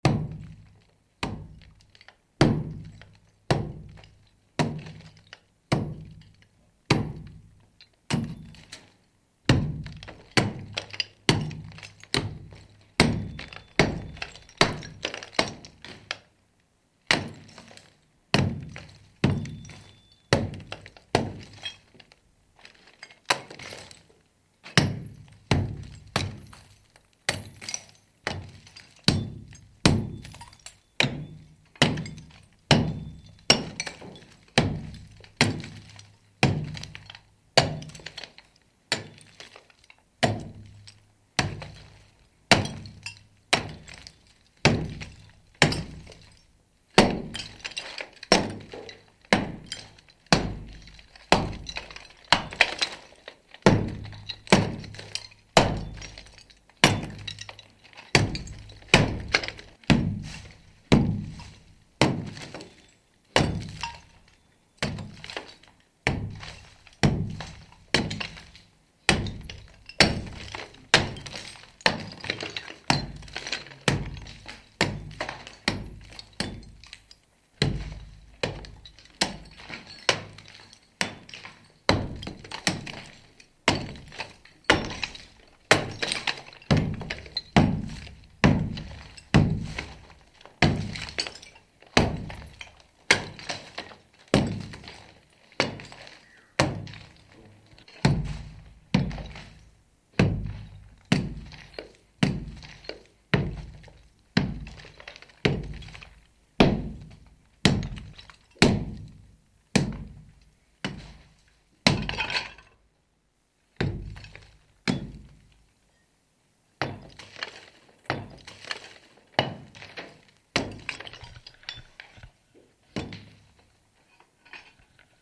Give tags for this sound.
djgriffin doors lock mantra master noise om pickaxe Prison ring-tone rough scrape scraping wall

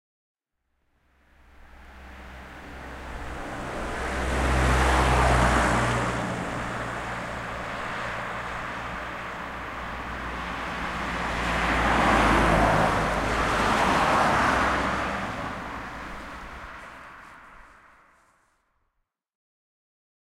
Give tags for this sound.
outside car circulation

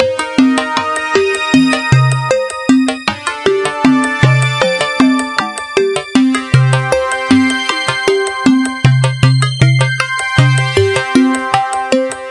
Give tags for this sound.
dance electro electronic loop minimal rhythmic

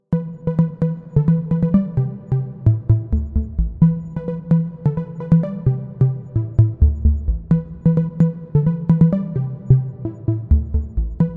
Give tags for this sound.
loop melody lead chris synth going brown easy rap beat

